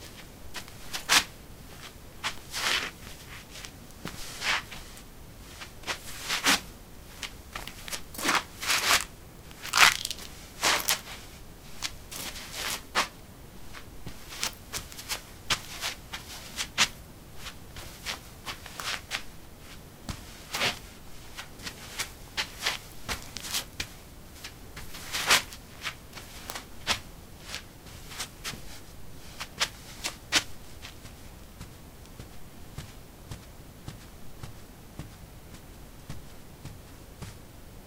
Shuffling on concrete: slippers. Recorded with a ZOOM H2 in a basement of a house, normalized with Audacity.